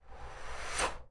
Shot of a gun